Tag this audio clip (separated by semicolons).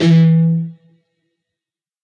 distorted-guitar; guitar-notes; guitar; strings; single-notes; single; distortion; distorted